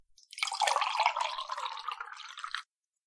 Just a short recording of me pouring myself a glass of water.
Hope this is helpful.